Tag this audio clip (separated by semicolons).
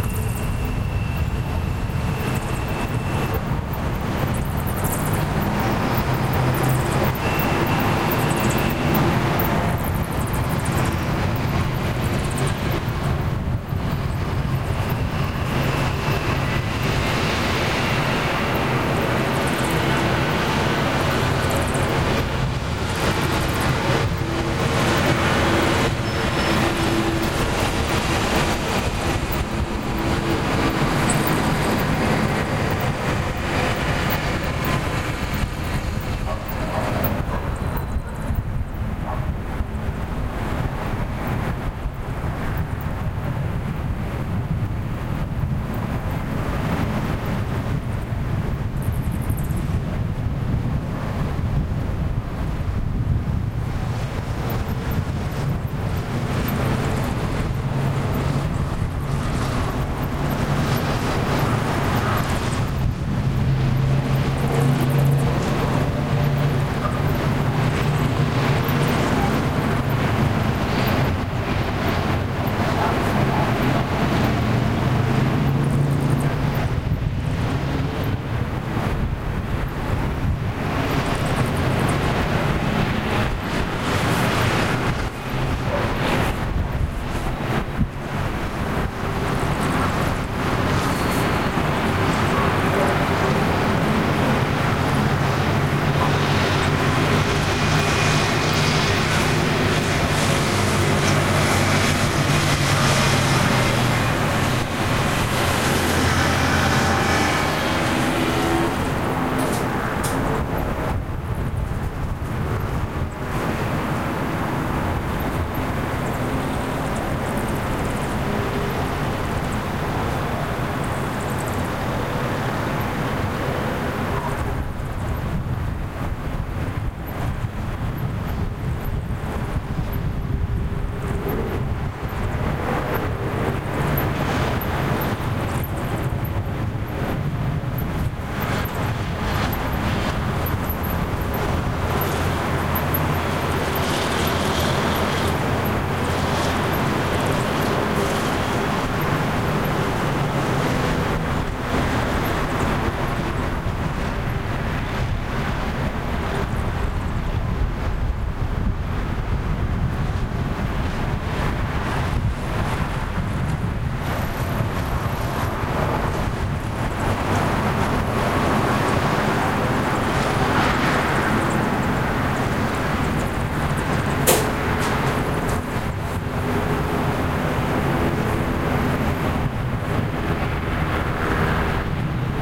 night-time; chirp; night; animals